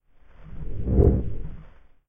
Heavy Woosh Small Sparks
small, effect, heavy, spark, fade, woosh, sparks, noise